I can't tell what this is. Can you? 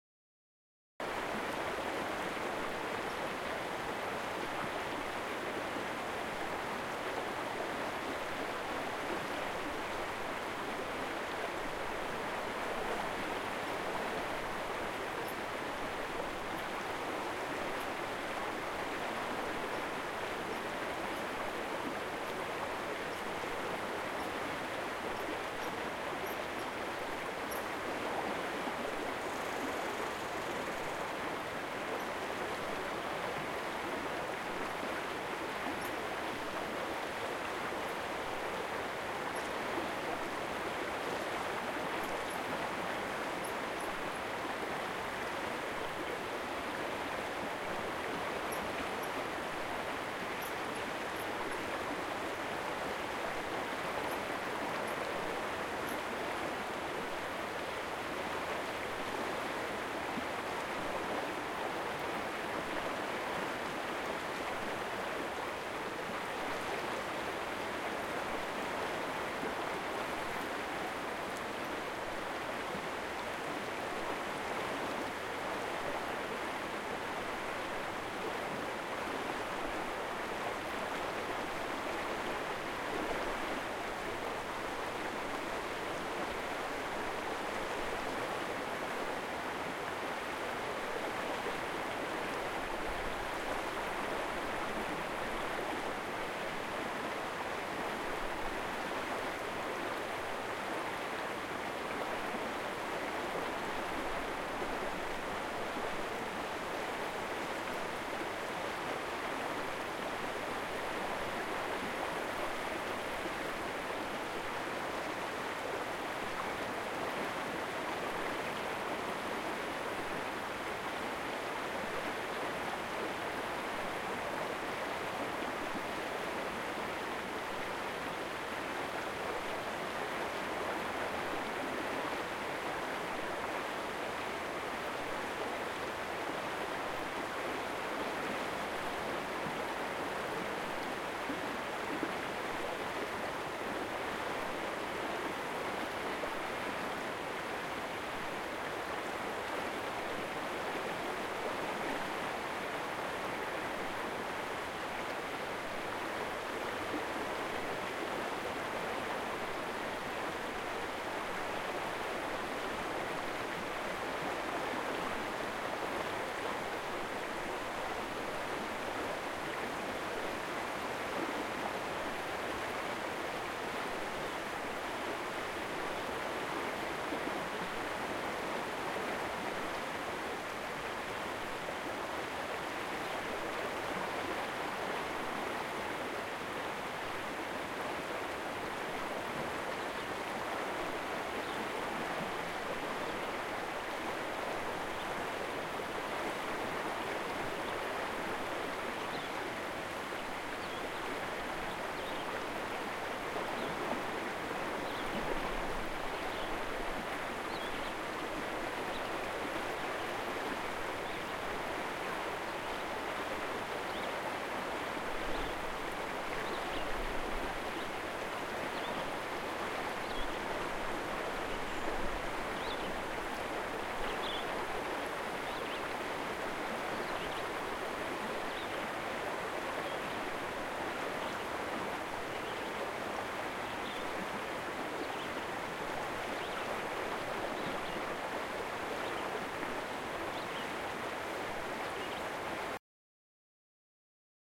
River Rushing Medium Size S
recorded early summer morning in Whiteshell Provincial Park in Manitoba Canada. I would appreciate feedback in regards to quality as I intend on recording and adding more sounds this pack soon.
ambience, medium, river, rushing